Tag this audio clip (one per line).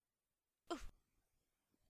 female
voice
oof